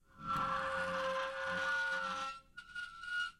002 chair friction
This sound is a recording of an annoying chair friction.
It was recorded using a Zoom H4 recording device at the UPF campus in the 003 aula from tallers.
The recording was edited with a fade in and a fade out effect.